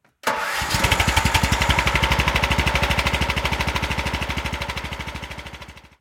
A lawn tractor starting up